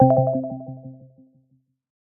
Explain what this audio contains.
UI Synth 01
An synthesized user interface sound effect to be used in sci-fi games, or similar futuristic sounding games. Useful for all kind of menus when having the cursor moving though, or clicking on, the different options.
gaming, gamedeveloping, indiegamedev, games, indiedev, synth, sci-fi, ui, electric, click, menu, video-game, electronic, gamedev, navigate, videogames, futuristic, select, game, sfx